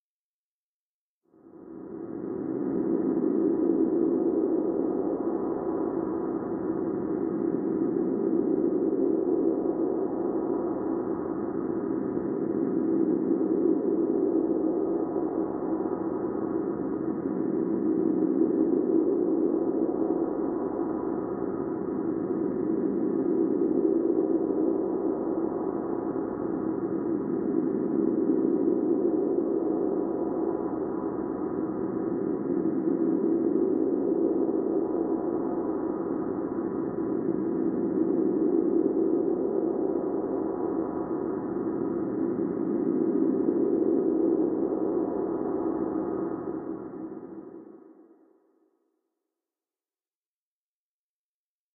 made with vst instruments
ambient, background, bridge, dark, deep, drive, effect, electronic, emergency, engine, futuristic, fx, hover, machine, noise, pad, Room, rumble, sci-fi, sound-design, space, spaceship, starship